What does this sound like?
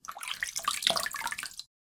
Small Pour 006
aqua, aquatic, bloop, blop, crash, Drip, Dripping, Game, Lake, marine, Movie, pour, pouring, River, Run, Running, Sea, Slap, Splash, Water, wave, Wet